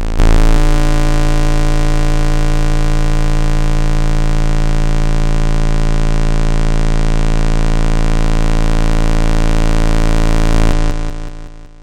2. Pulsing grainy bass.